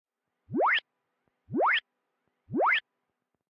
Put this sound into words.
Resonant Chirp Noise
Chirp sound generated from analog synth
analog, button, chirp, communication, computer, electro, electronic, error, message, noise, resonant, synth